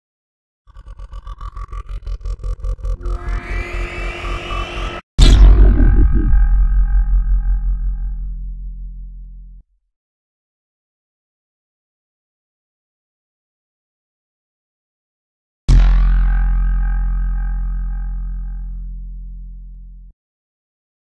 Space Laser
I made this laser sound in Ableton Live. I needed to make something that resembled a large space cannon, or something of the sort.
explosion, ray, gun, laser, blast, space